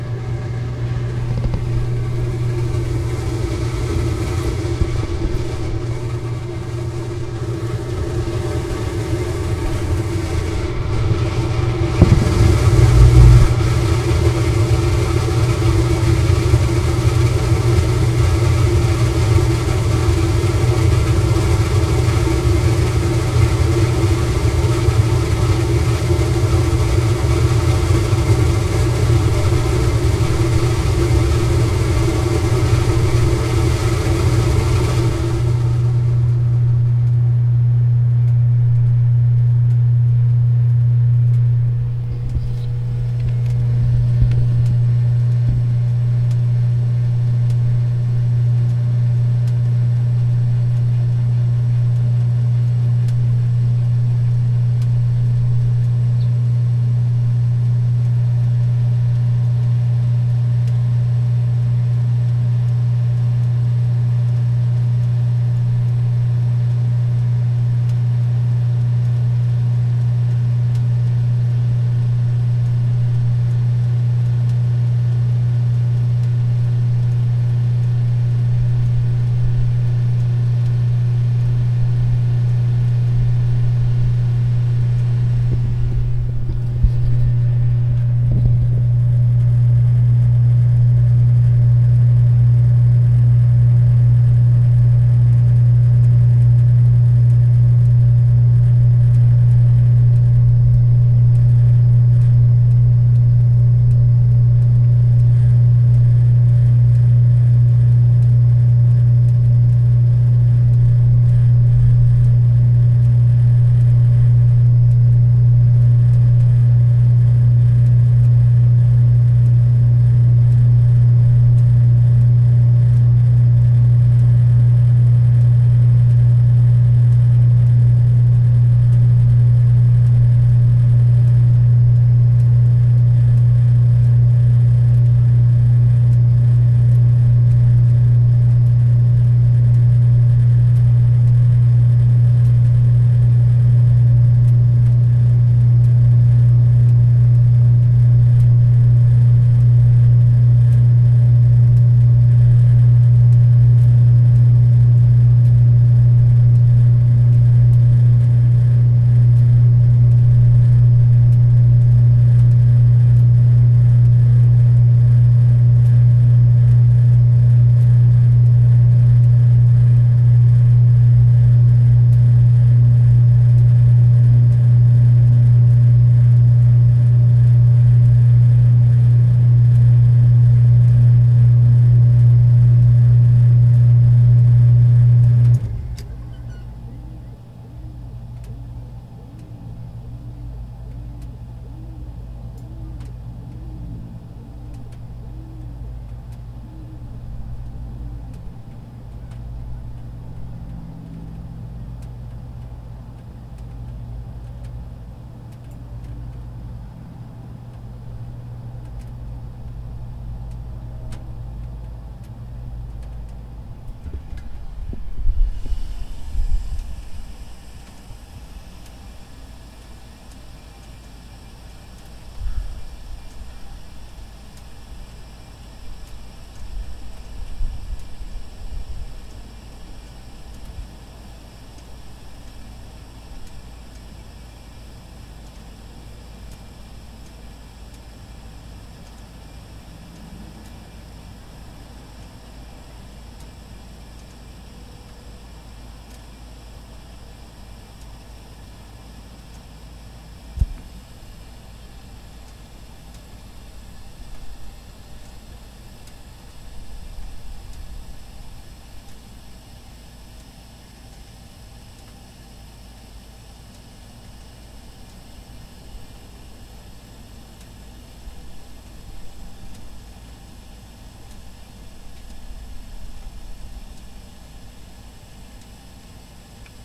Machine Multi Stage
multi stage machine